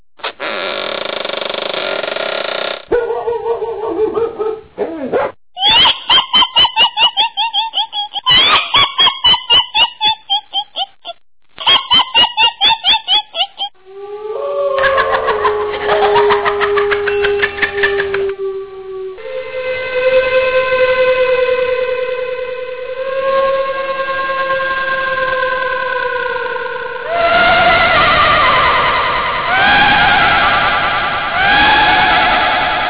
Sms Suara Hantu
Indonesian kuntilanak (Malaysian Pontianak). Kuntilanak or Pontianak is a 'female' ghost. In many film it has creepy laugh and appearance. Usually it has bosom-length dark hair, white long dress and bloody gore wide hole in it back....